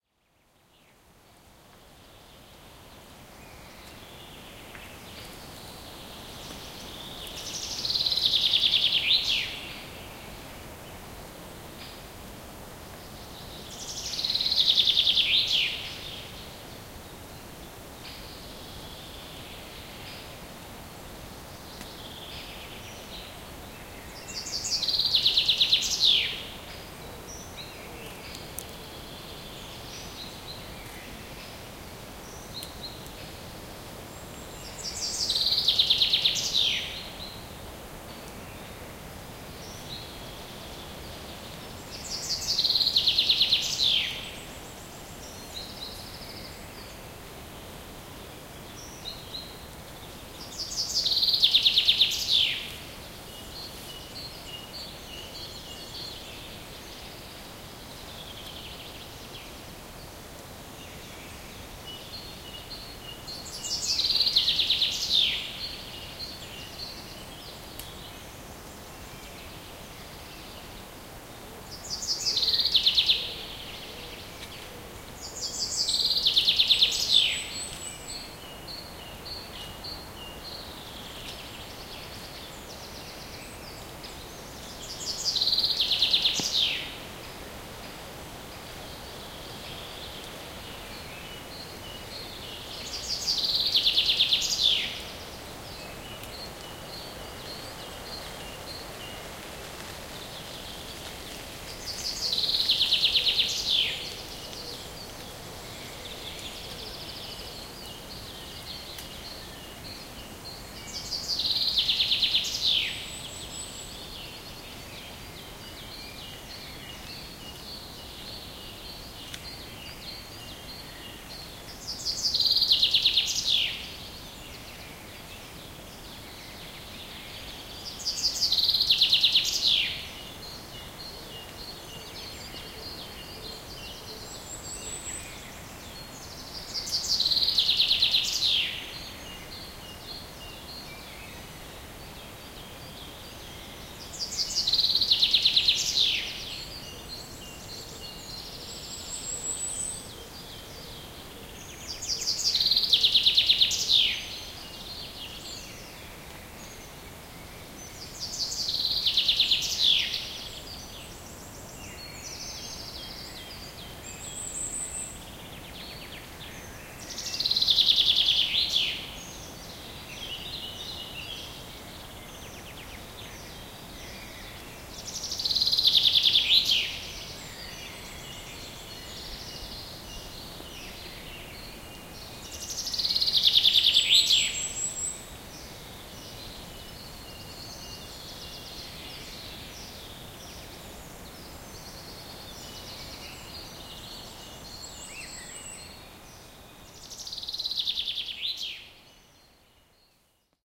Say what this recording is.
Spring time birdsong in polish forest.
birds, birdsong, field-recording, forest, Poland, spring